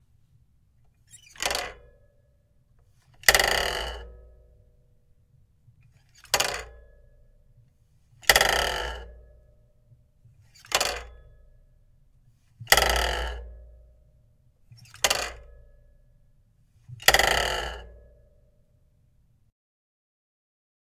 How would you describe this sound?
Bike kickstand being opened and closed